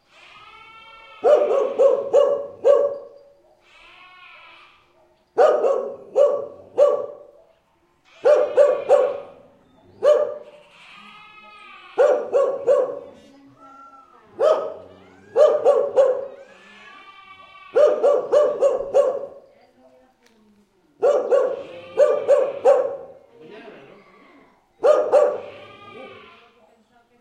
20070408.dog.bark
a dog barks in an open coutyard. Bleating of lambs, a peacock screeching, and human voices in background. Sennheiser ME66+MKH30 into Shure FP24, Edirol R09 recorder.
field-recording, voice, ambiance, nature, bleat, spring, lamb, south-spain, dog, barking